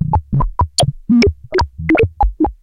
nord glitch 019
More strange gurgling modulation from a Nord Modular synth.
bleep, digital, glitch, gurgle, nord, synth